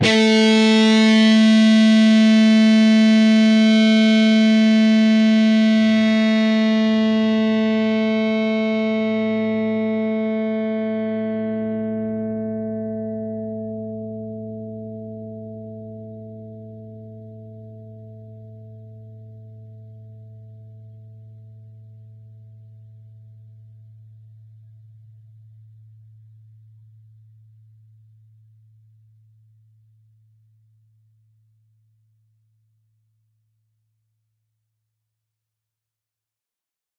Dist sng A 5th str 12th frt Hrm
A (5th) string, 12th fret harmonic.
distorted, distorted-guitar, guitar, guitar-notes, single, single-notes